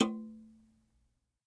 aluminum can 02
Plucking the pull tab of an aluminum can.
aluminum, bell, can, clang, percussion